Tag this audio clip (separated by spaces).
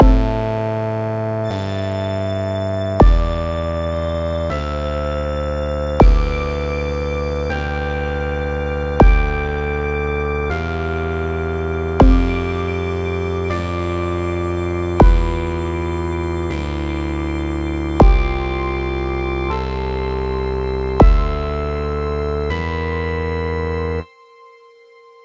Creepy Horror Old piano Scary